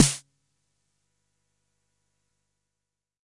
various hits 1 020
Snares from a Jomox Xbase09 recorded with a Millenia STT1
xbase09, drum, jomox, snare, 909